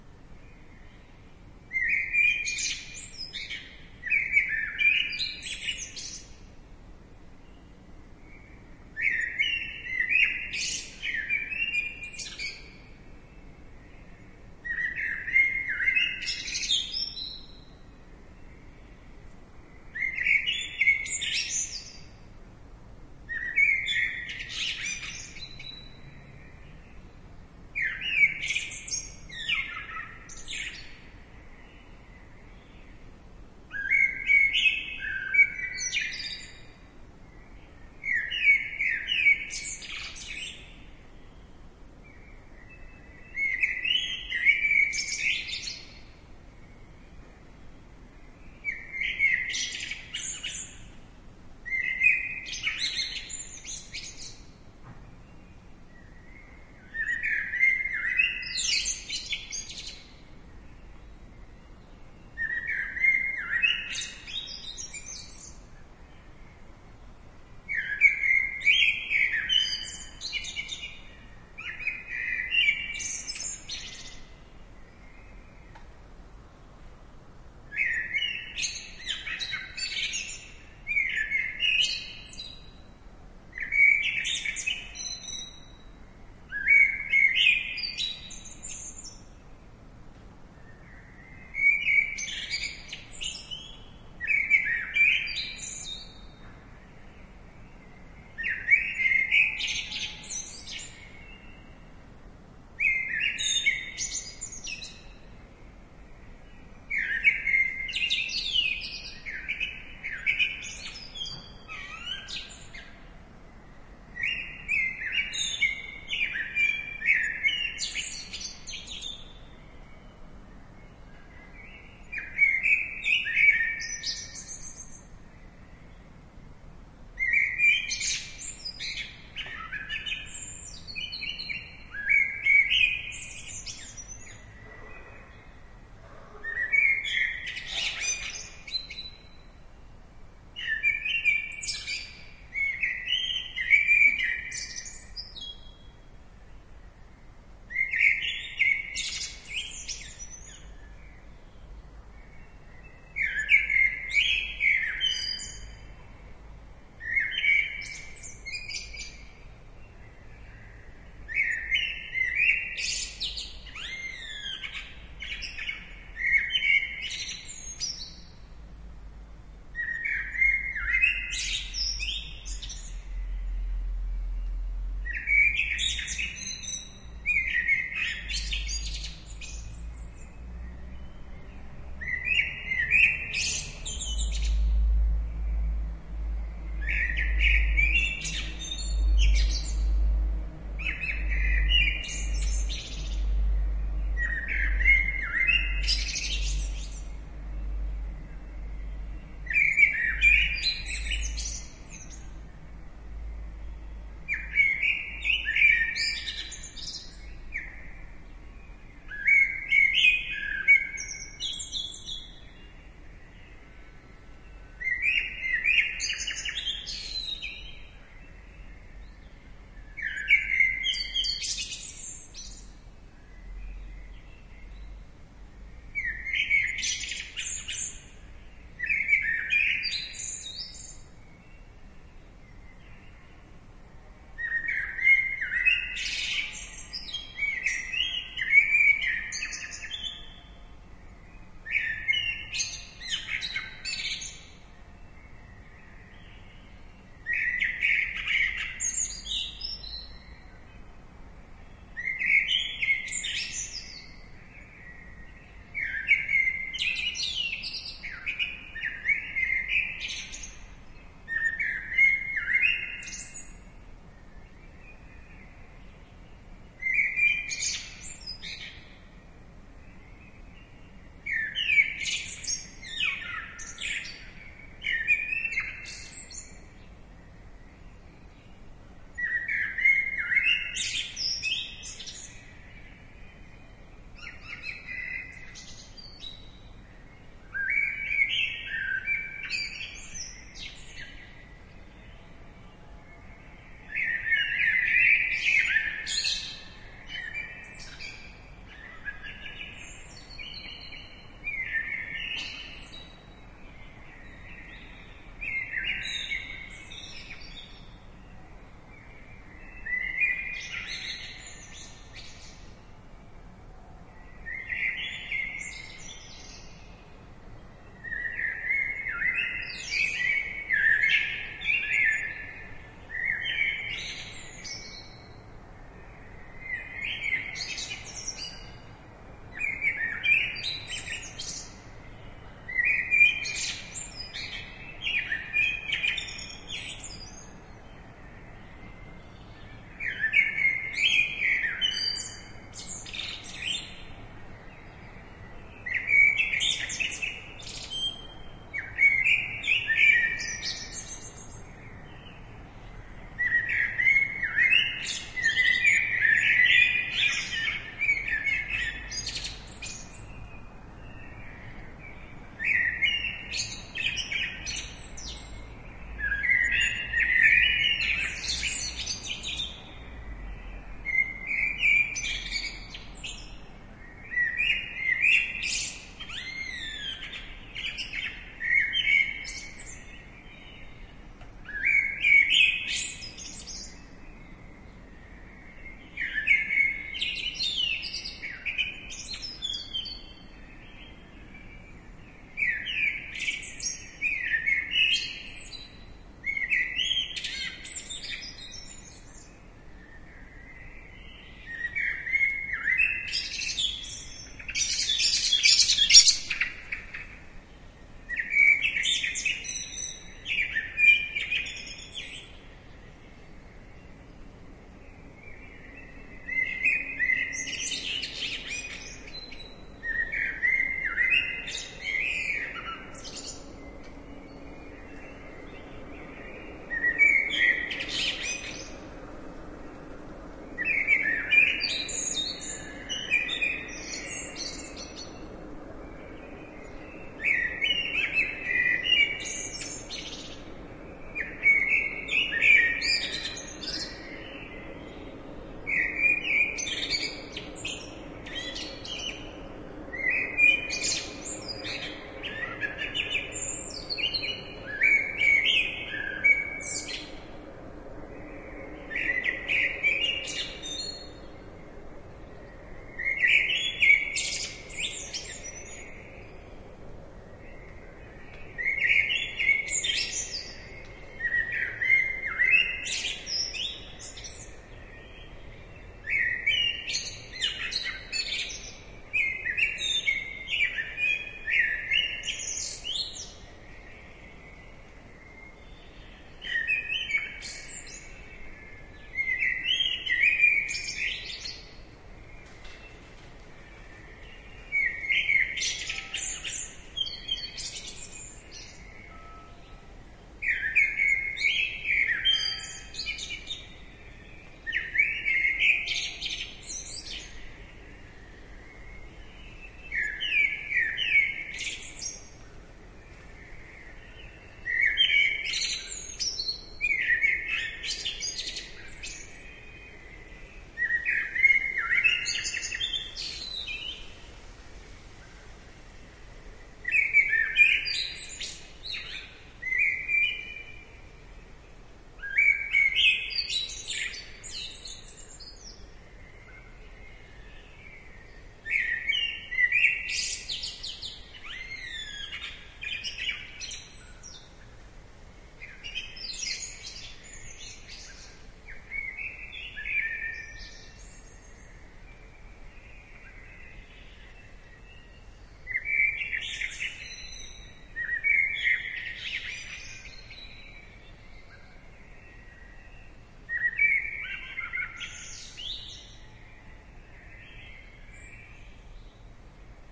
Recorded at 3.50 am on a Saturday morning in Hanover/Germany in June 2009. A Blackbird singing. Sennheiser ME64 microphones, FP-24 preamp, Superbitmapping device SBM-1 from Sony into TCD-D8 DAT recorder.